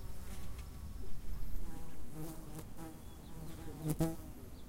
Fly buzzing my head at a lake
A fly buzzing around my head while I was trying to enjoy the views of Long Lake in the Uintas.
Recorded on: Tascam DR-05X
Date: July 16, 2022
Location: Long Lake, Uintas, Utah, USA